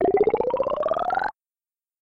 Mana Drink [166188 drminky potion-drink-regen]
Edited DrMinky's sound by removing some samples and duplicating the middle one and speeding it up to give it another sequence of "steps".
mp, liquid, eight